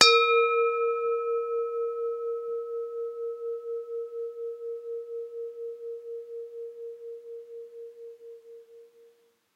Bowl Bell #1 (One Hit - Fade)
An Asian singing bowl bell.
Bowl, Singing